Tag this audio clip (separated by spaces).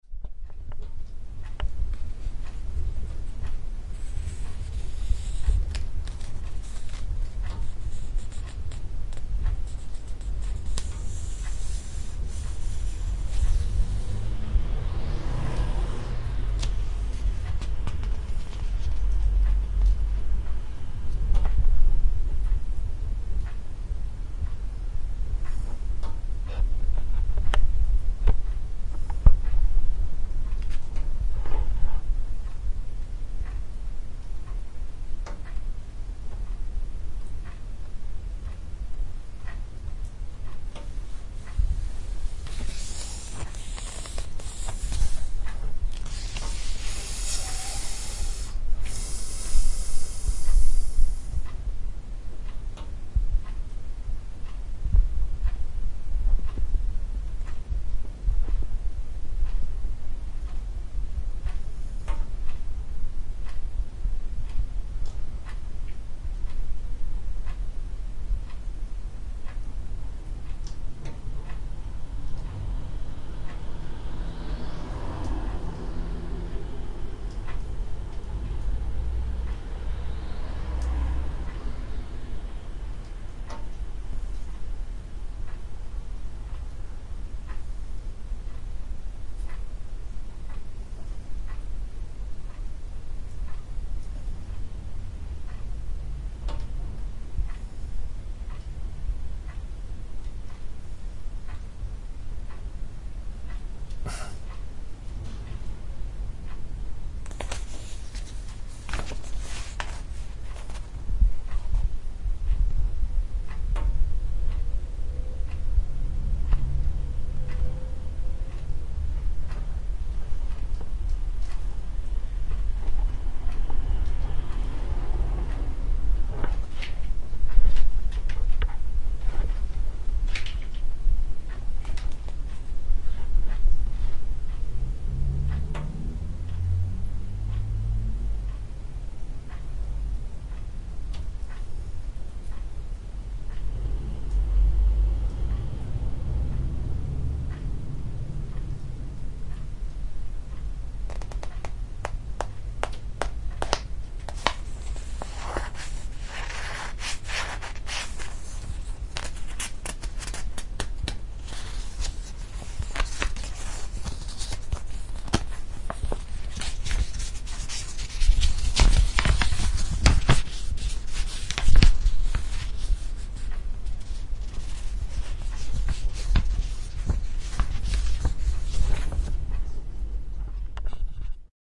cars atmosphere passing pages turning